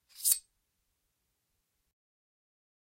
Sword Unsheathed
sword being pulled from its sheath.
Recordists Peter Brucker / recorded 4/21/2019 / shotgun microphone / pipe and scrap metal